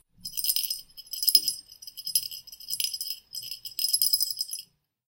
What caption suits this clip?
made with a small ell. may be useful as a feedback sound
beep, ding, feedback-sound